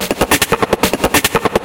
TECHNO TECHNO TECHNO
loop, techno